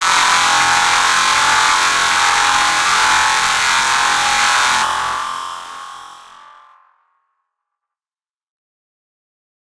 FL studio 12
VSTI: 3x Osc-C3
Tone: C3
Tempo: 100
Maximus: mid-triggered gate
Reeverb: acoustic drums
Vocodex: old school
FL Filter: retro
F Fast Dist: the heat